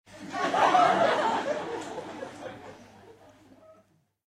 LaughLaugh in medium theatreRecorded with MD and Sony mic, above the people
audience, auditorium, crowd, czech, laugh, prague, theatre